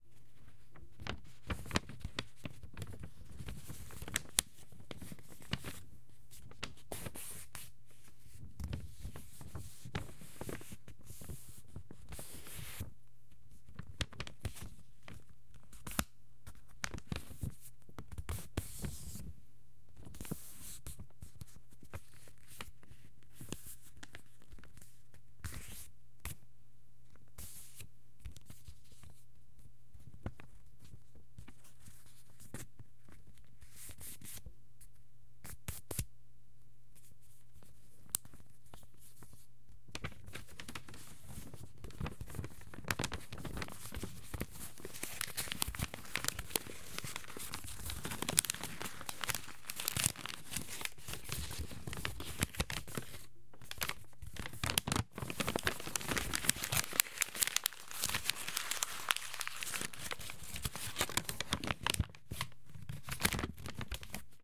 Someone rumpling paper.